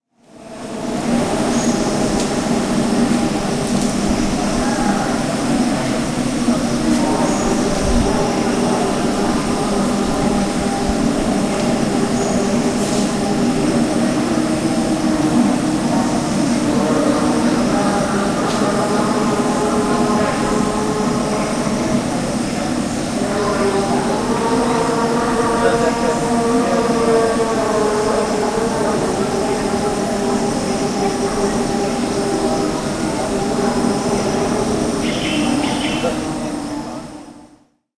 mountain-temple
In the mountain temple of Batukau, the preparations are underway for a major festival. In the background a priest sings.
field-recording, singing, temple, voice